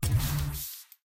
a steampunk style grapple hook made in REAPER from cutting up and doing EQ, pitch, and fade manipulations. source audio is me smashing my bicycle chain against various surfaces while recording from iphone audio recorder.